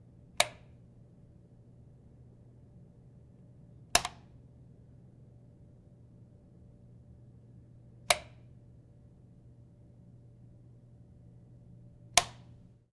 light switch in a home turned on and off twice.
Sennheiser 416T -> Sound Devices MixPre -> Zoom H4N.